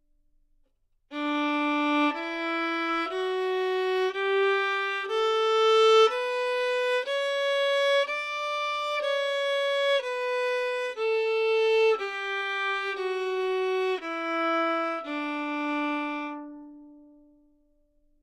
Violin - D major
Part of the Good-sounds dataset of monophonic instrumental sounds.
instrument::violin
note::D
good-sounds-id::6278
mode::major
Dmajor, good-sounds, neumann-U87, scale, violin